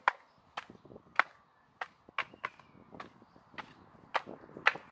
Gravel Footsteps
Recorded using a zoom h2n recorder. Footsteps on gravel. Edited in audacity.